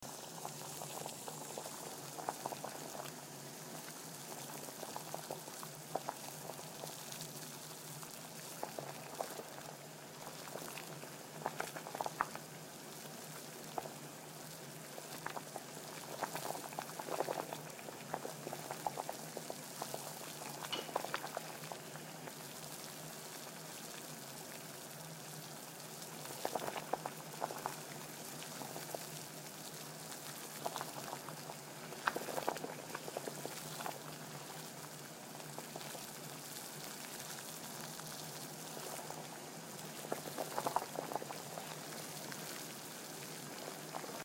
Cooking pasta brewing water.
kitchen, cook, cooking, pasta, pot, brewing